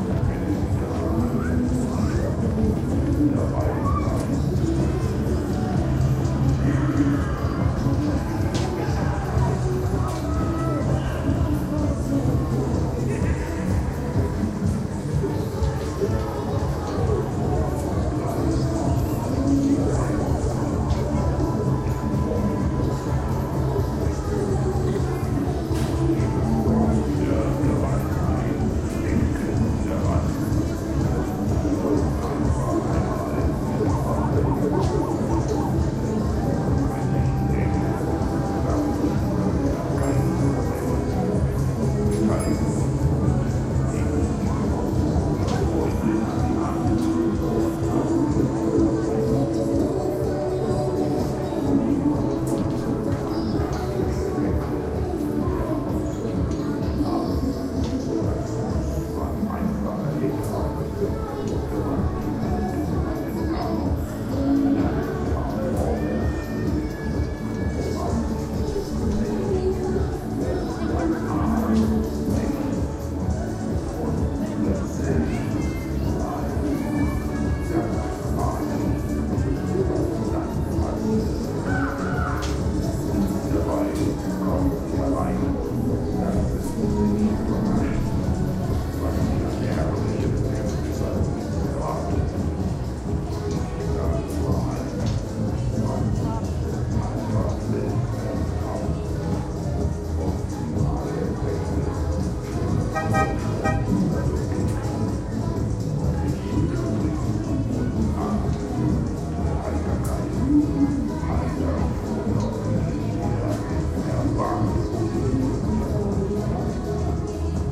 120909-1049-FG-TdS-Kleinmesse
Recordings of a fairground in a small town in Germany, starting the day's business.
Wide angle shot of the fairground, somewhat later. All the rides are working, some early customers are milling around, you can hear German "Schlager"-music playing and a spooky voice beckoning people to the haunted house ride.
Recorded with a Zoom H2, mics set at 90° dispersion.